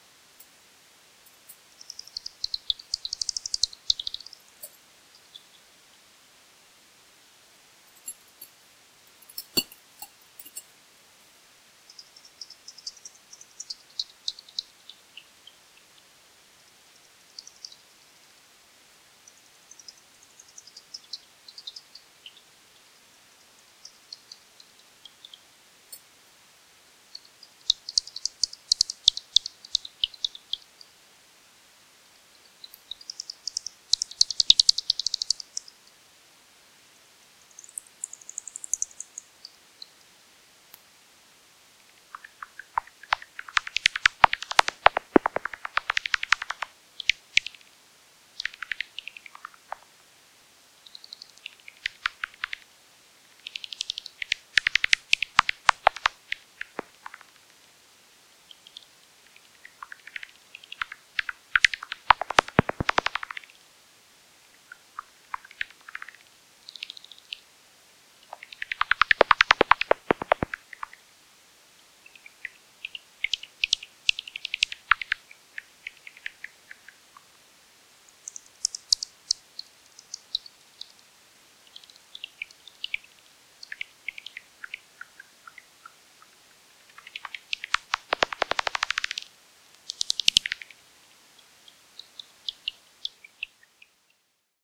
Bats in East Finchley